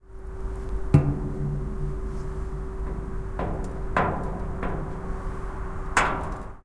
blows on metal
field notes, sounds of metal crashes